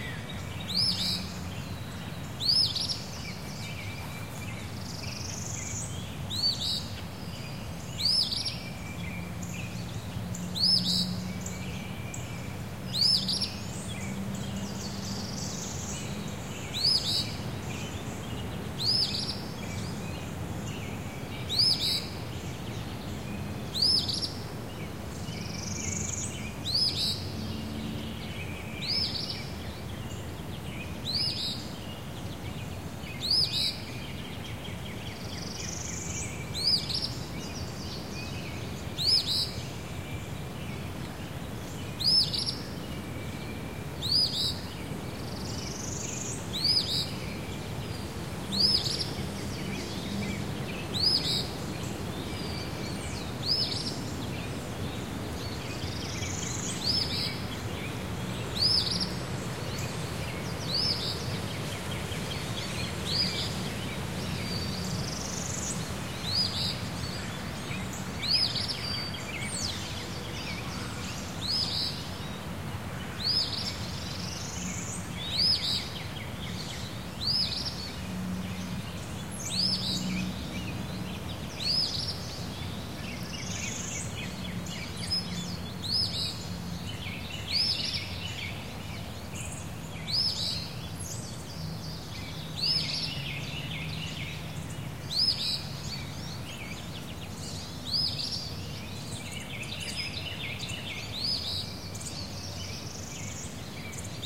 SpringEarlyMorningBirdsTWOCreekHabitatApril10th2013
This time of year is very vibrant in the woods of southern Illinois...this chorus of warblers and other similar songbirds, was recorded using my H4N recorder and the built-in microphones.
Enjoy the natural soundscape!
forest, field-recording, birds, woods